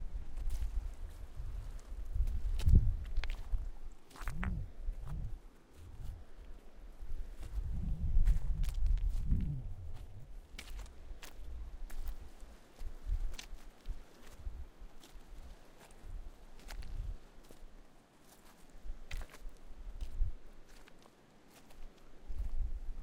Footsteps beside a river
Walking beside the Skagit River in North Cascades National Park. You can hear both the rush of the river, the waves lapping on the gravel bar and my footsteps walking on the (very large) gravel. Unfortunately I had left my windscreen at home, so there is some wind noise. That is a mistake I will not make again.
crunch, wind, water, footsteps, gravel, walking